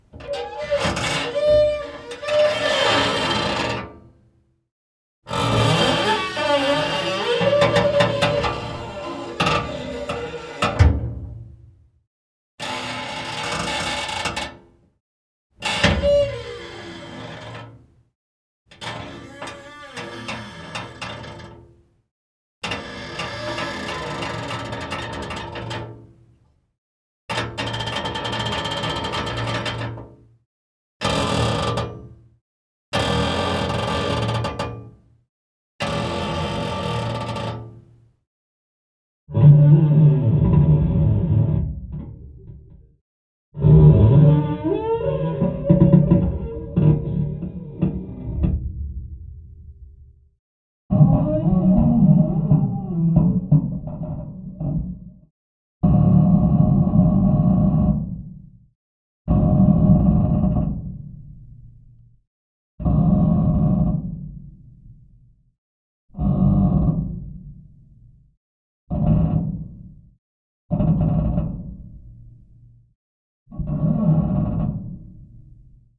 Foley Object Metal Oven Creaks Mono
Old Oven Metal Creaks (x20).
Gear: AKG C411 (x10) // Geofón (x10)